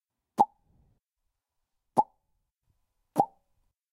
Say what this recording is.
Plops Carla
Plops made with mouth, using individual technique that can't be replicated, unless you practiced this as a six year old.
mouth, sound, plopping, plops